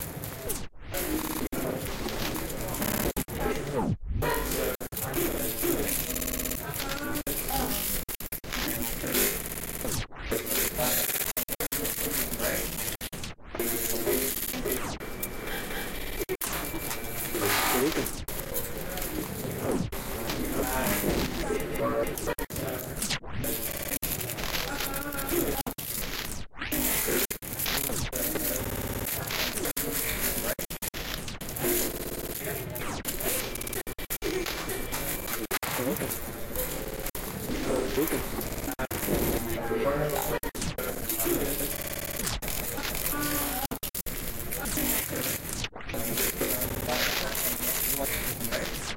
tb field burrito
One in of a set of ambient noises created with the Tweakbench Field VST plugin and the Illformed Glitch VST plugin. Loopable and suitable for background treatments.